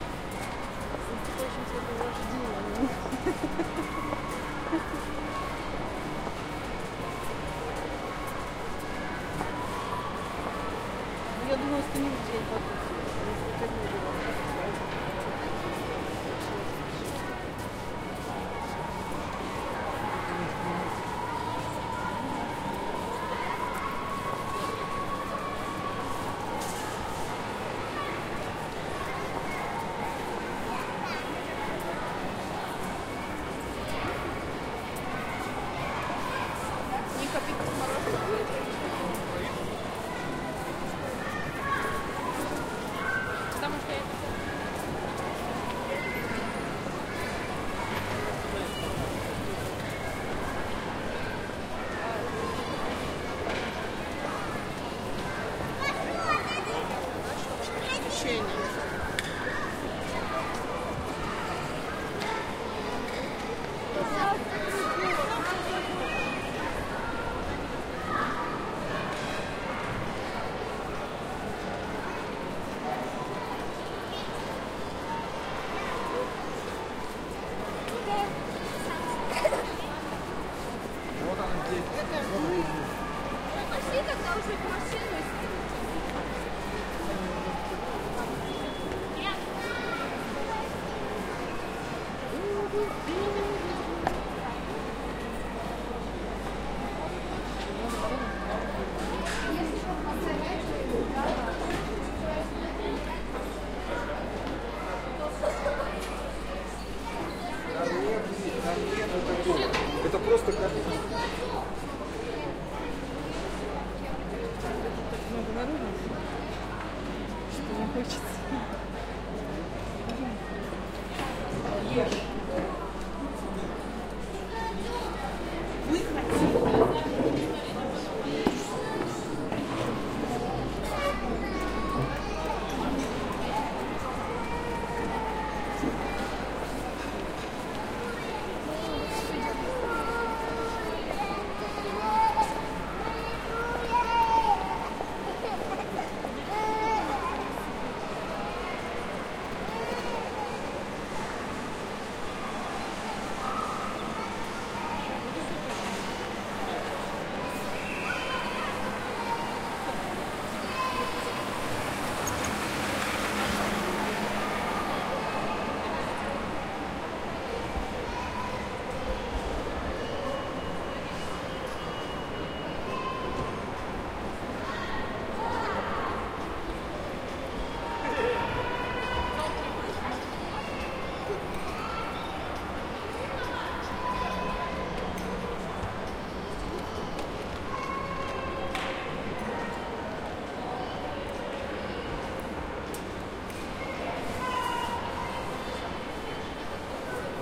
Atmosphere in the shop (Ikea) in the Omsk.
Walking near canteen places. Peoples talk, children voices. Deep echoes and noise.
Recorder: Tascam DR-40.